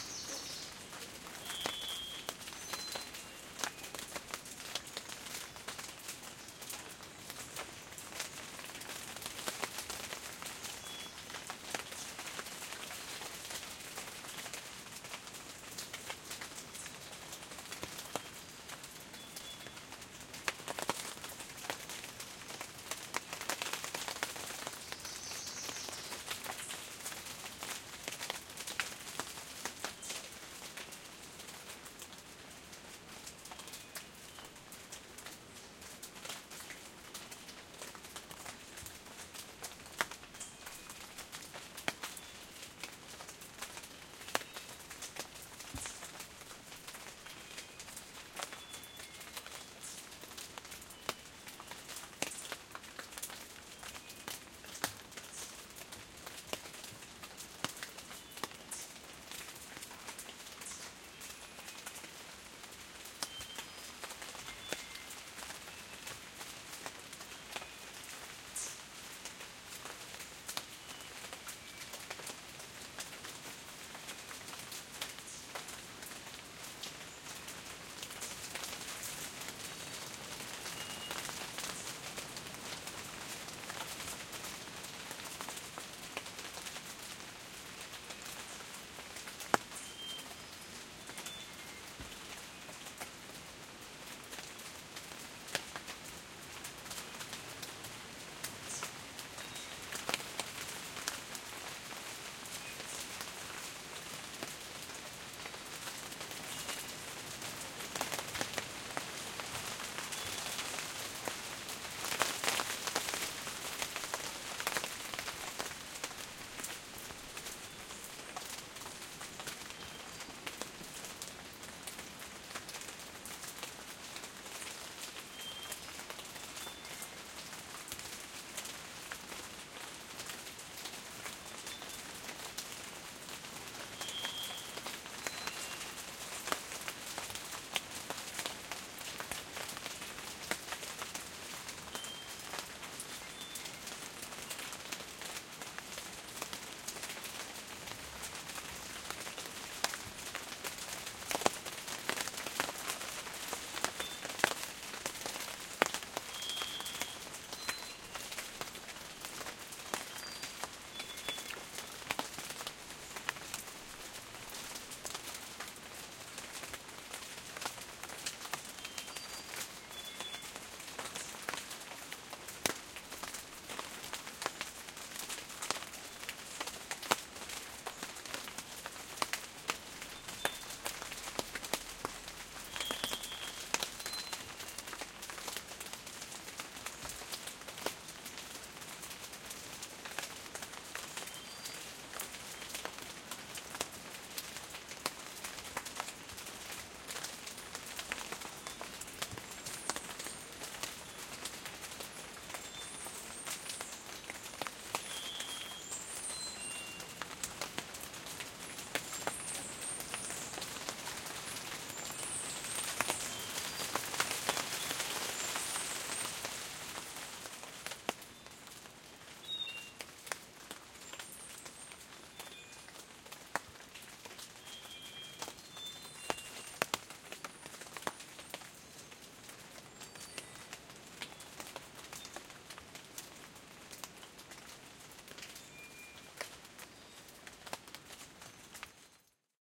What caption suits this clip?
cr cloud forest 06
An ambient field recording in the Monteverde Cloud Forest Reserve. Lots of birds and rain and general cloud forest sounds. Recorded with a pair of AT4021 mics into a modified Marantz PMD661 and edited with Reason.
birds, animals, tropical, outside, forest, costa-rica, nature, ambient, field-recording, birdsong, wind